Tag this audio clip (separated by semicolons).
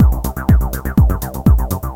tb; loop